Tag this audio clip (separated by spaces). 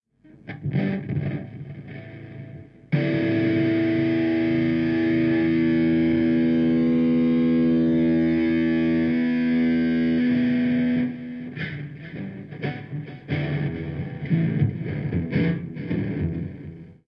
guitar feedback